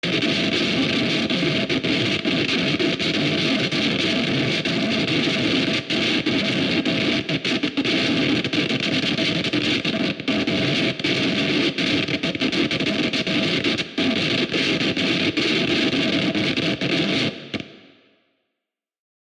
Recorded myself pulling on a balloon on GarageBand and edited the sound to make it sound like static. Enjoy!
Balloon, GarageBand, Scratch, Static